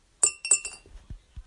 An sound recorded with my phone for an video game!
Recorded by me!